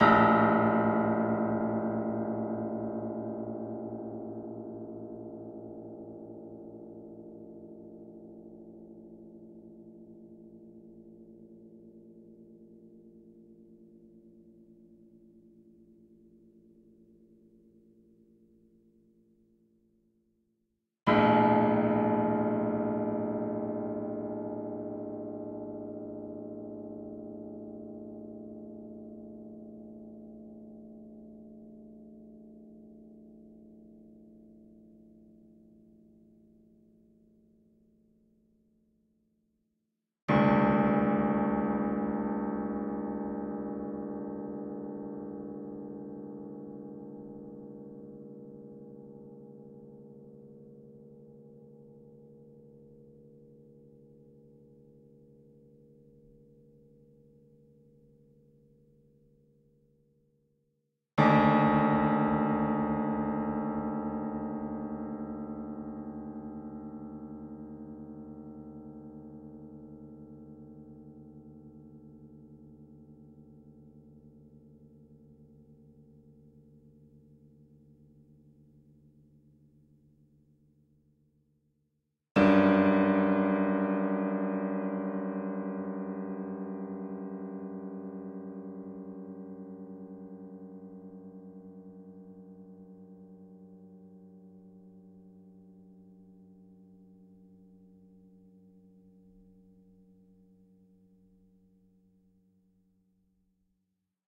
Detunned Piano Five Samples
Five untunned keys of real broken piano
tuned, keys, film, horror, madness, abnormal, pumping, piano, fear, not, mystical, strings, death, otherworldly, mystic, upset